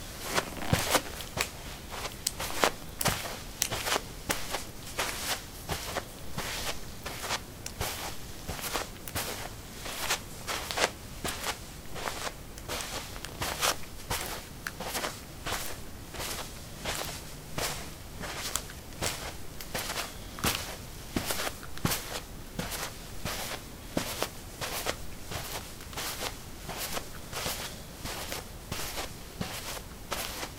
carpet 07a leathersandals walk
Walking on carpet: leather sandals. Recorded with a ZOOM H2 in a basement of a house, normalized with Audacity.
footstep, footsteps, steps